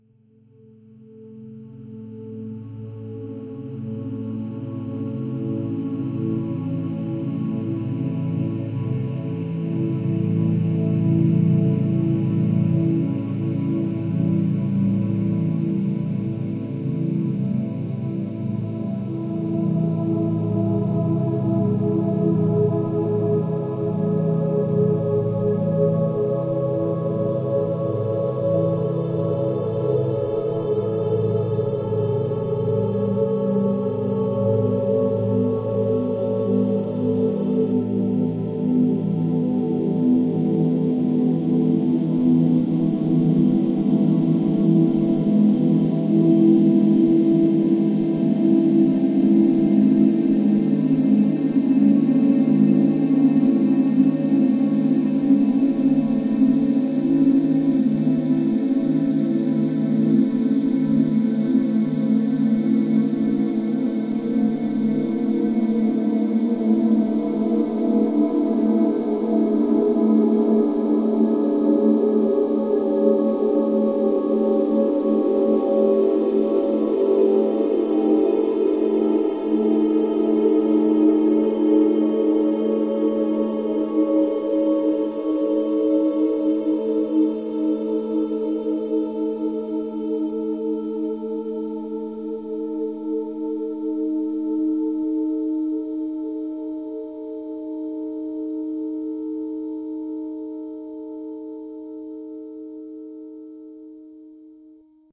An ethereal sound made by processing female singing. Recording chain - Rode NT1-A (mic) - Sound Devices MixPre (preamp)
atmospheric, blurred, choir, emotion, ethereal, experimental, female, floating, synthetic-atmospheres, vocal, voice